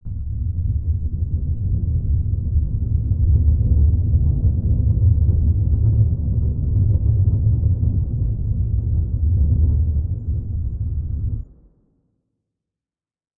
Droning sound, sub bass.
drone, bass